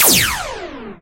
Clasic Laser/Raygun shot. Big gun/laser.